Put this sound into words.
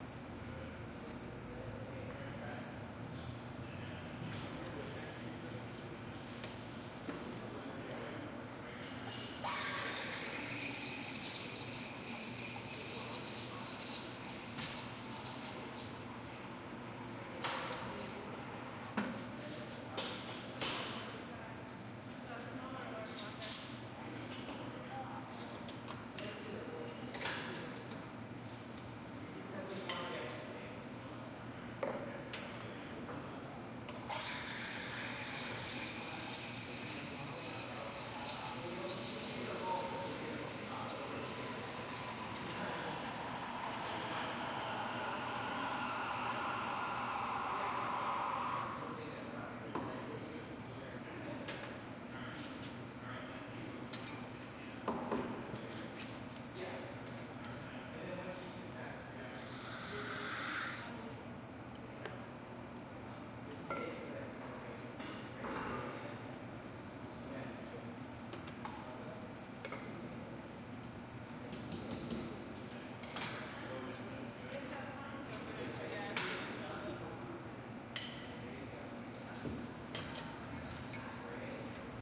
Busy Coffee Shop
This is a local coffee spot on a Sunday afternoon.
city, steamed, field-recording, ambiance, barista, coffee-shop, milk